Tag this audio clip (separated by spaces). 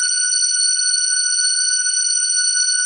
Breathy Bright Digital Multisample Organ